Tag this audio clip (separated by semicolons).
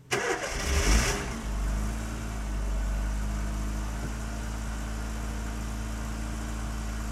starting; car